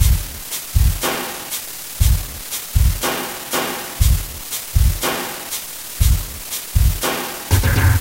Considerable work went into creating this file (oddly enough) - it required a process of trial and error until I could establish how to export a file as raw date from Audacity, then find the right settings to import that file.
Then I had to experiment with importing it to PaintShop Pro as a greyscale image. Then save it as raw data and import back into Audacity.
When I could do that I saved the file in PAitshop pro as JPEG.
Then loaded it again and saved it again as raw data.
Then imported into Audacity.
As I expected, the JPEG compression process resulted in some degradation of the original sound file.
The original sound was this one:
rhythm, processing, noisy, paintshop-pro, image-to-sound, JPEG, processed, sound-to-image